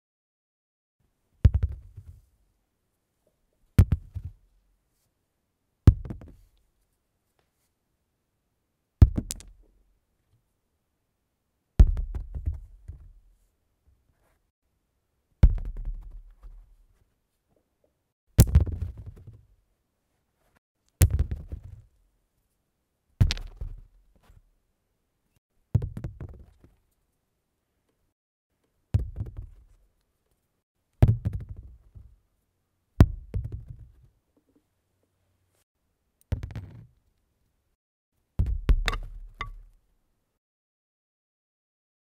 piedras cayendo 1
wood, stone, impact, bounce, caida, piedra, close-up, madera, drop, rebote